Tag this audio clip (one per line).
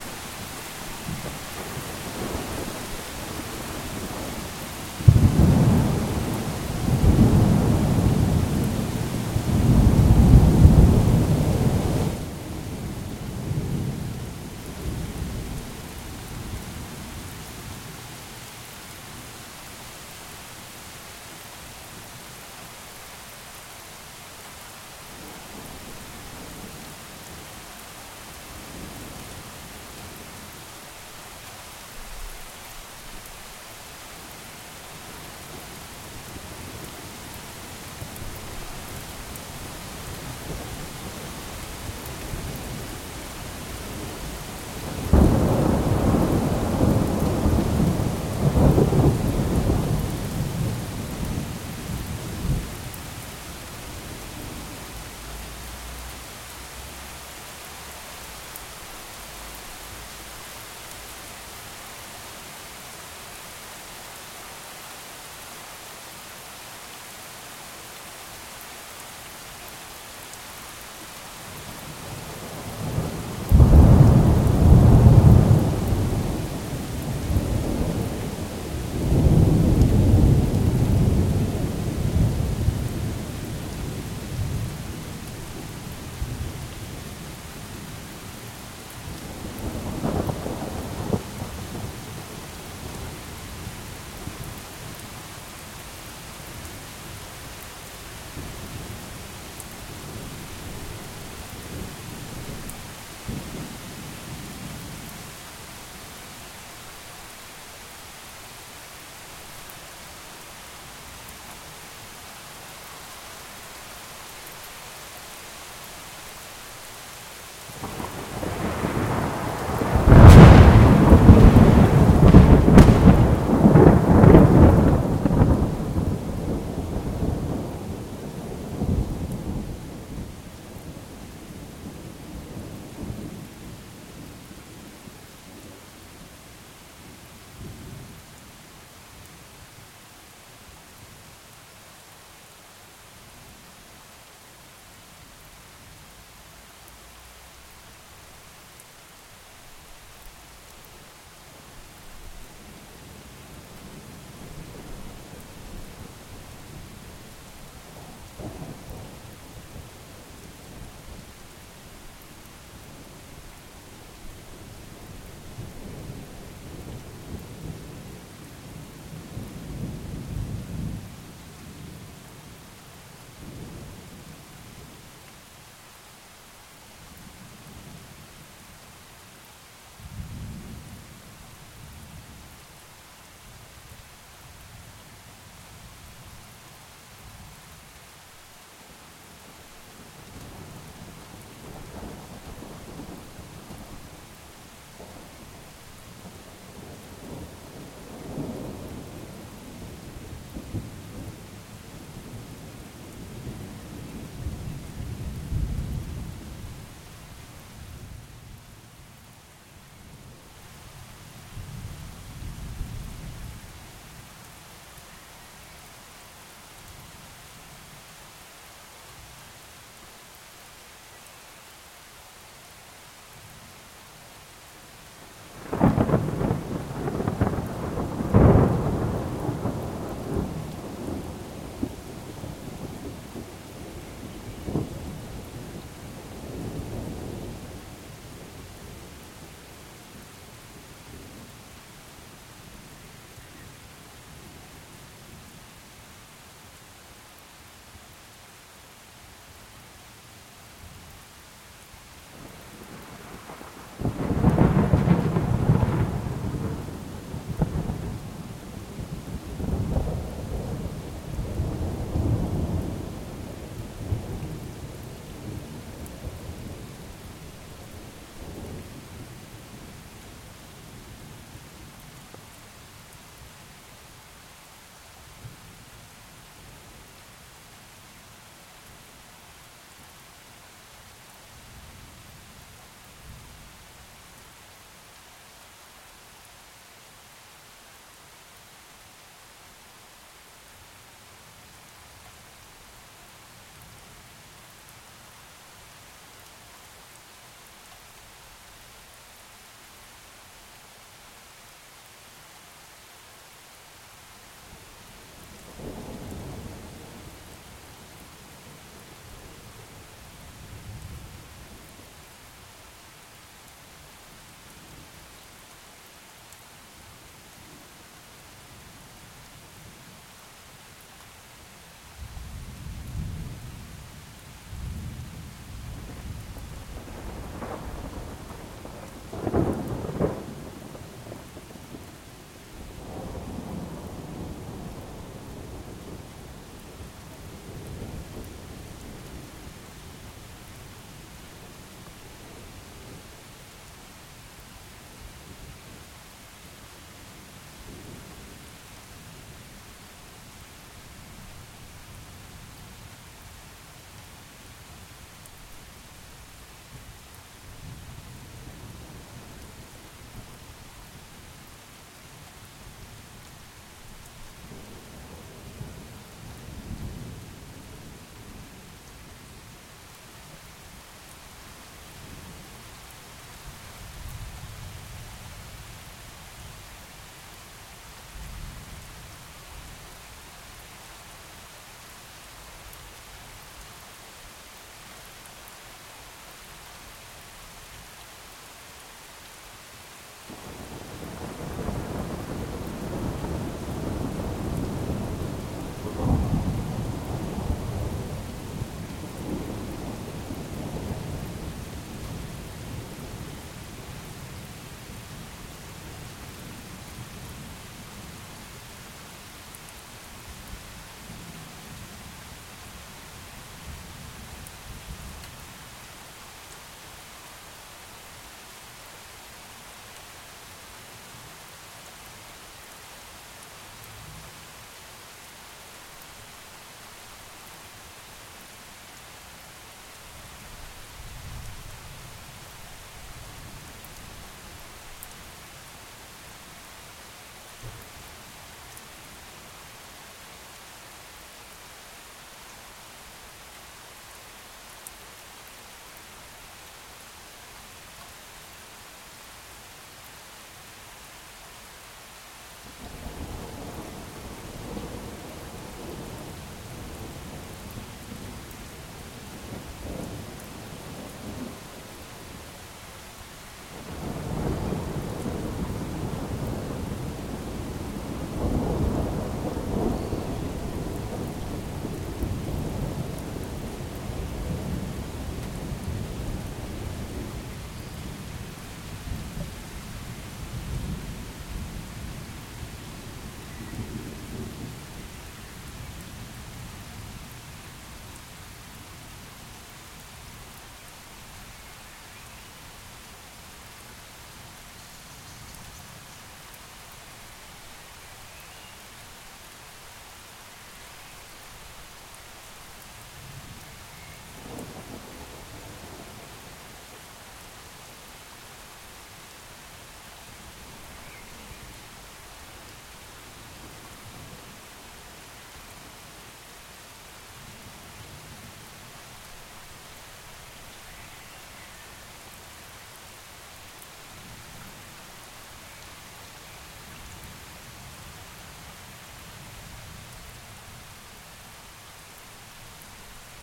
film
thunderstorm
Regen
rainstorm
field-recording
Summerstorm
Donner
thunder
noir
rain
Sommergewitter
normal